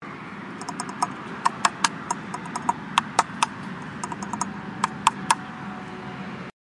MySounds GWAEtoy Scrape
TCR, field, recording